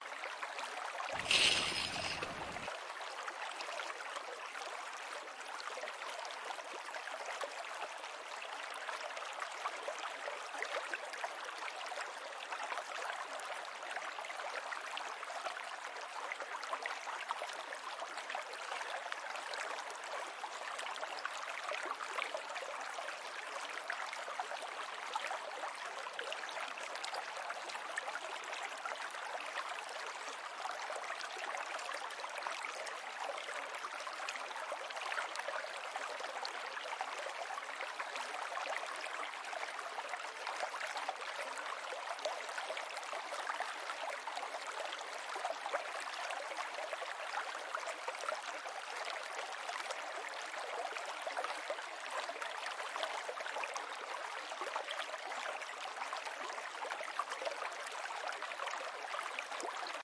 Casting a fishing line by a river
fishing, fish, river